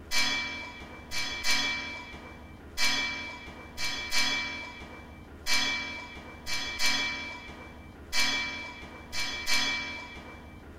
PONCHON Alix 2016 2017 BellTower
Production Step :
This sound is from a sound recording of a key hiting an iron bar.
I take just one sound from my reccording and copy/paste in a new track. I amplify the sound and do a low pass filter. I copy paste my track and create another one just above. I move this new track for create a gap between the two tracks. On the second track I supress some of the beat for create a rythm. On the second track i change the pitch, for making a sound more high-pitched.
Description :
This sound looks like the ring of a bell tower. The rythm change every two bars who makes him unique and not settled. This sound is, for me, a reference of the track begining of dark techno music.
Typologie de Schaeffer :
Masse: Nodal
Timbre harmonique: Eclatant
Grain: Résonnance
Allure: Mécanique
Dynamique: Ronde
Profil Mélodique: Ascendant puis descendant
Profil de Masse: Dilatation